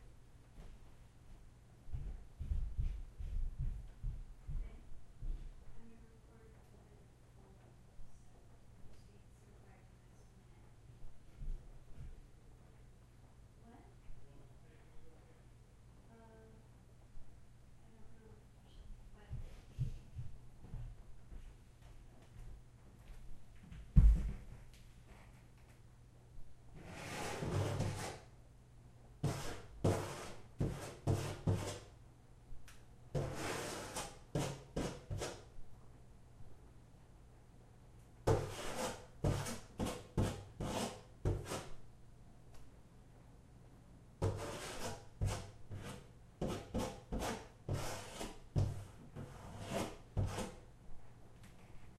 scratch door
Foley recording for an audiodrama. Claws scratching on a wooden door.